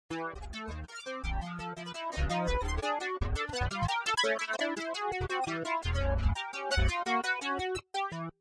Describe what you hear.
Dissonance-2-Tanya v
dissonance, chaos, ambient, for-animation